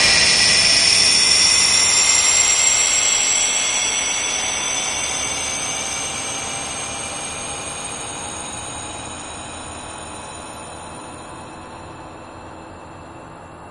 Was messing around and made this sound for fun. I thought it would be a good teleport or charging sound.